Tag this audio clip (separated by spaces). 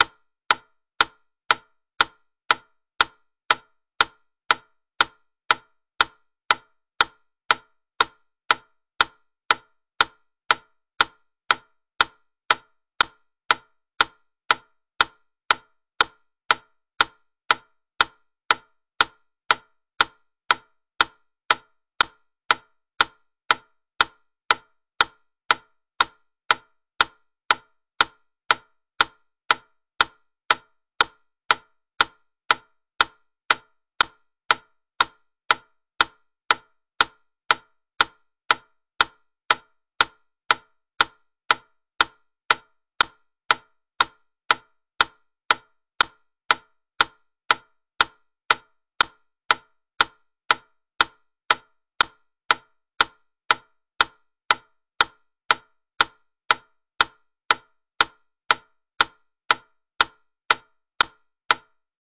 120-bpm tick-tock wittner-metronome